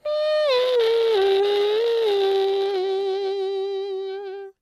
electric guitar e minor2
Imitation of electric guitar solo part in e-minor. I almost close the mouth, sing some tones and blow little air for distortion effect.
dare-19, guitar, voice, distorted, beatbox, solo, sing